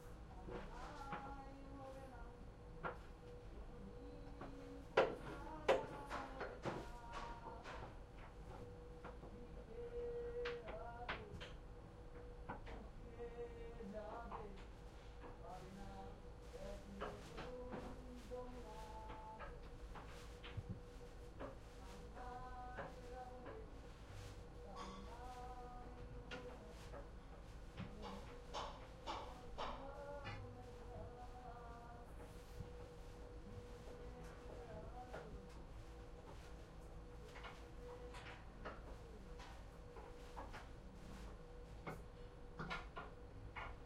080920 02 song under metal
people singing during the work